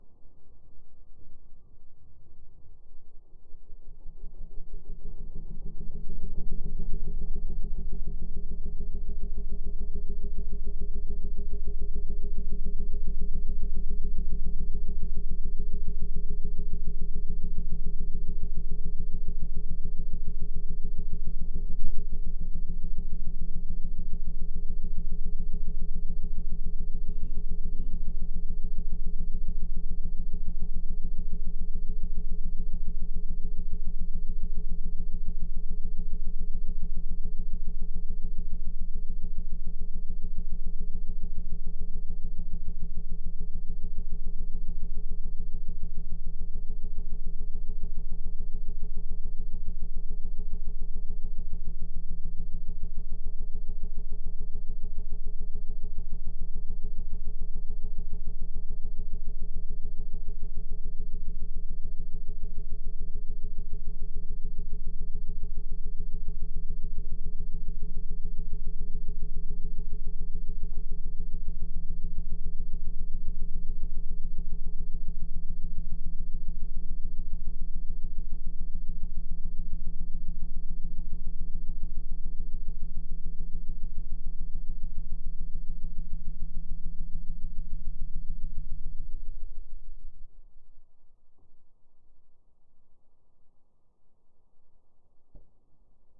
Love the sound of a small trawler leaving harbour at night when the sea is calm and no wind , Single cylinder Engine,
fishing, night, silent